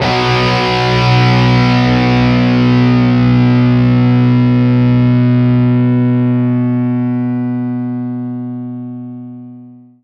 B2 Power Chord Open
Distortion, Electric-Guitar